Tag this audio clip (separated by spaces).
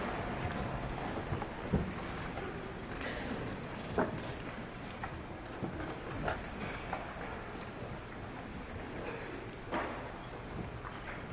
ambiance quiet static